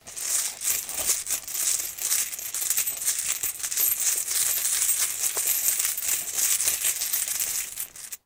Coins - Money 07
Pop some tissue and a woolly hat in a bowl, pop that in the sound booth next to the mic and let your coins drop. Then edit that baby - cut out the gaps that are too far apart until the impacts of the coins land at the time you want.
pop, hit, Coins, drop, coin, Money